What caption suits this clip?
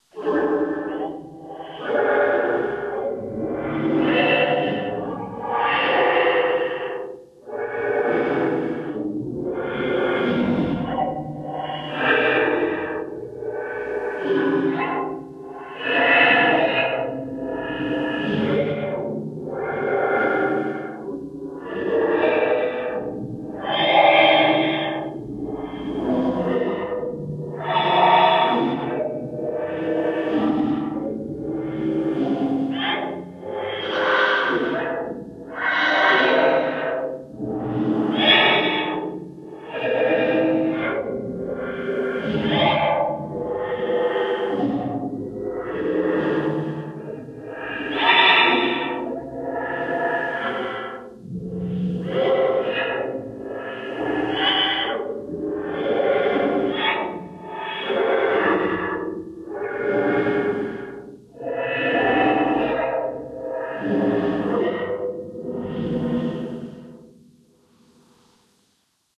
alien corridors
a lo fi trundle down the corridor of an 50s alien vessel.
50s, alien, alien-effects, alien-fx, b-movie, drone, lo-fi, sci-fi, spaceship